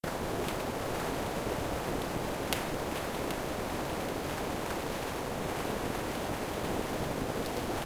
Short free rain file processed to sound like rain outside
of house or other dwelling.
nature, processed, water